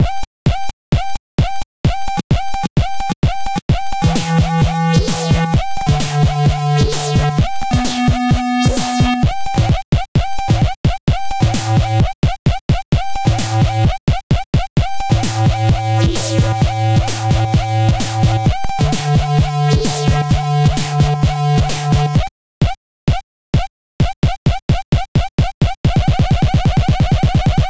FUNKY CONCERN !!!
Funky Concern Music
hiphop, funcky, music, sound, edm, trance, electra, sfx